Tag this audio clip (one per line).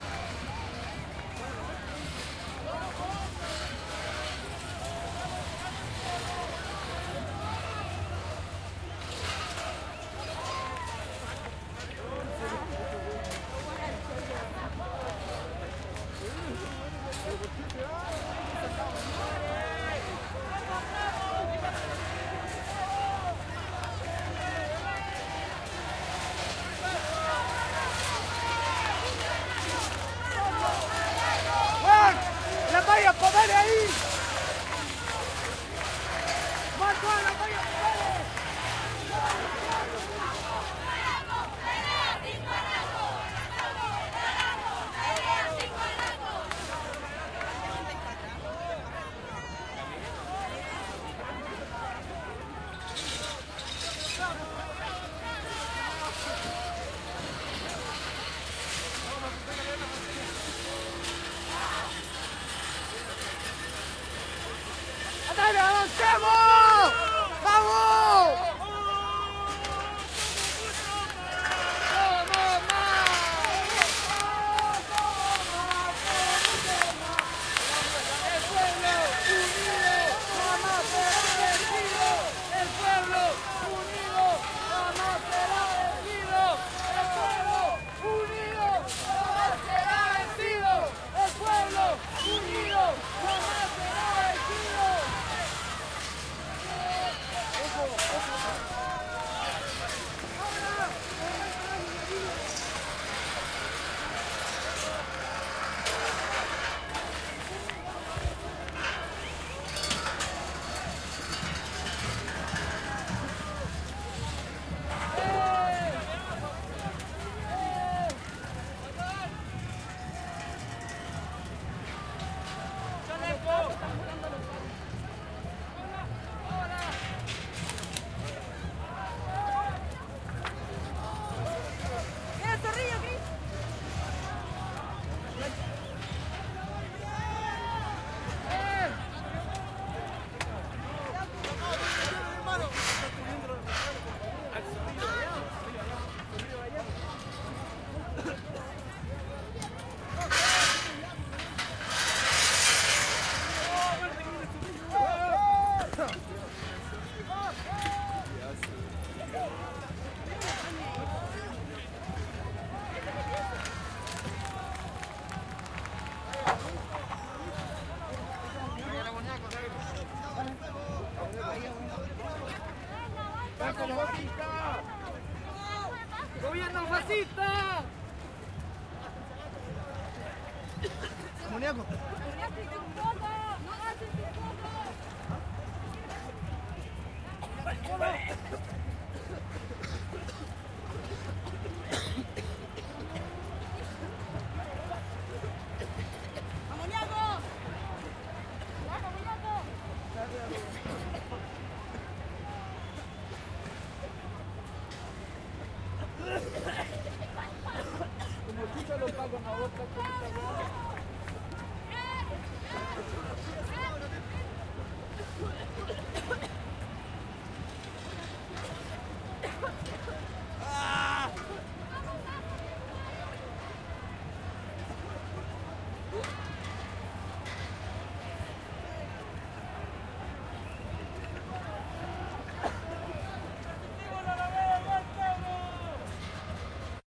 nacional
people
marcha
chile
calle
santiago
protesta
exterior